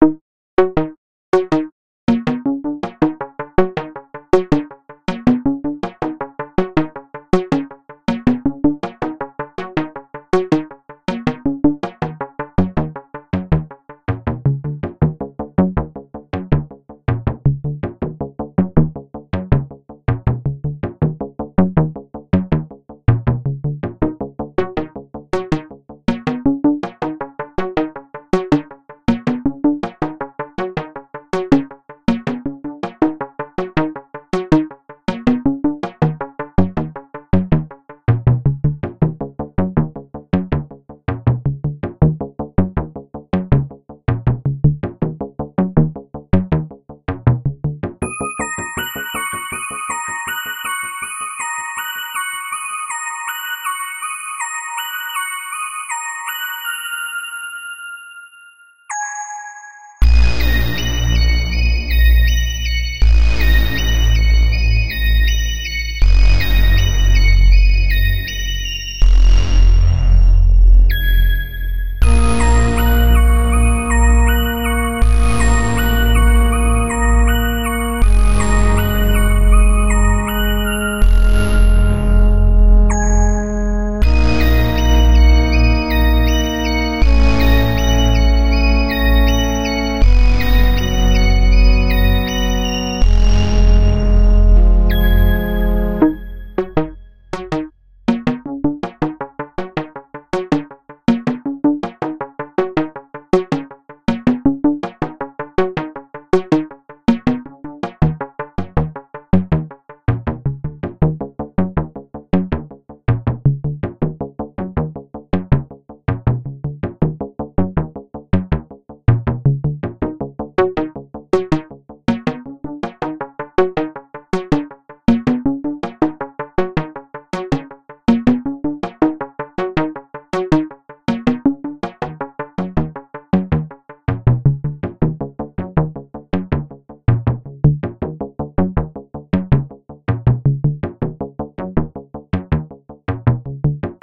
Playground Runaround
This sound was made in FL studio for a video game with an 8-Bit style. It's fast-paced and very retro sounding. Gives a good lively 80s or 90s vibe